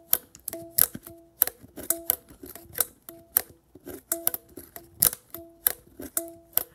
Mogalyn Sequencer Rebuilt
Plucked sounds from a Mogalyn sequencer.
instrument, pluck, recorded